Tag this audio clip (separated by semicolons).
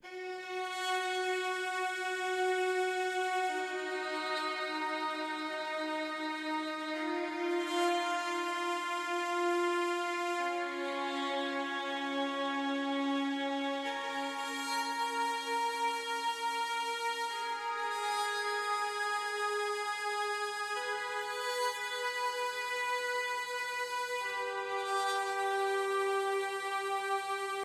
classic; ensemble; orchestra; orchestral; strings